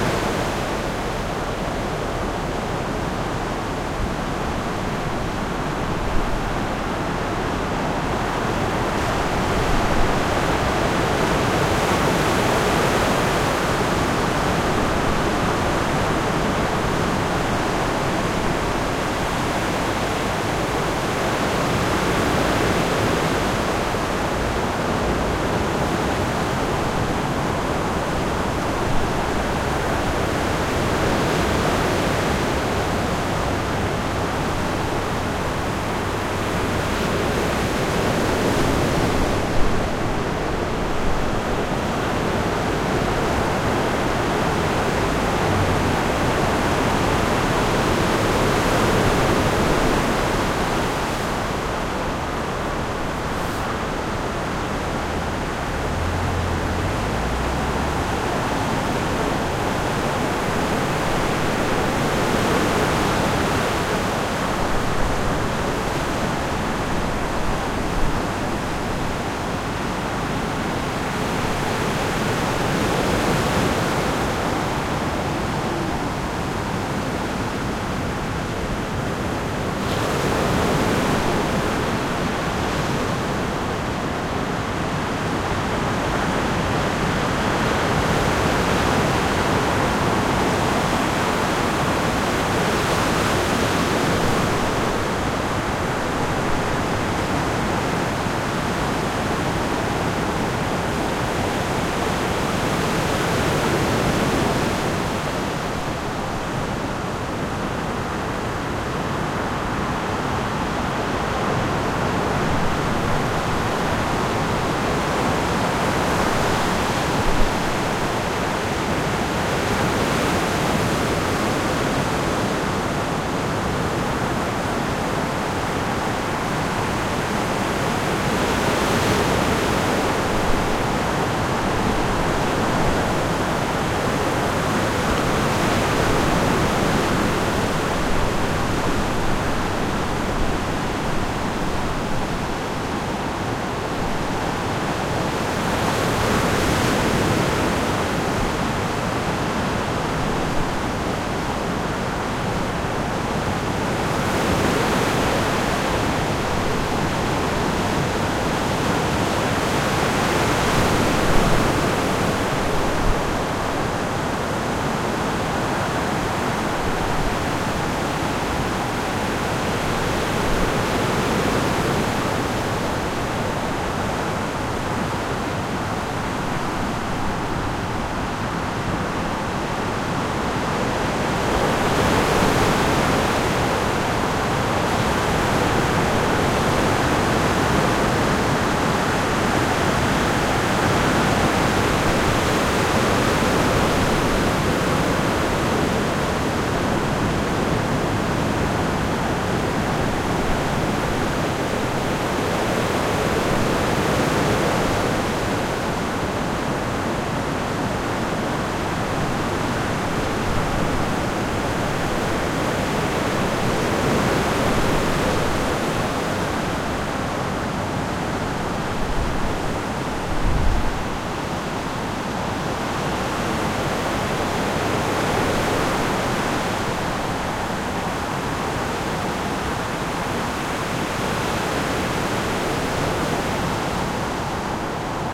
ocean waves
Well, even that the Northsea at North Berwick might not be classed as an ocean, it was on this day: the waves were big and it was very windy. Recorded in October 2010 with a PCM-D50 recorder. You can hear the "roar" of the sea.
To have a look of the spot where I did the recording: